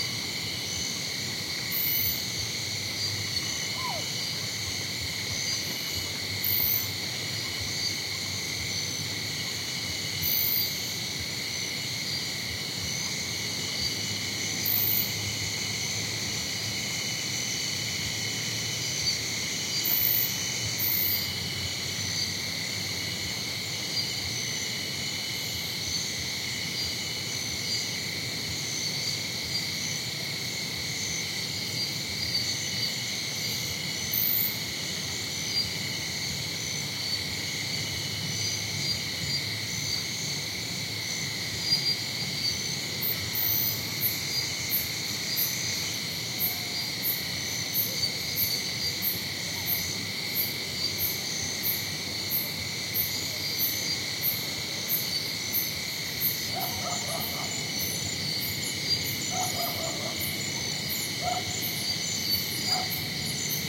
Recorded in Chiangmai. Sound Devices 664, two Sanken CS-3e (cardioid) in ORTF.